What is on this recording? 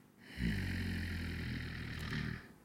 Good quality zombie's sound.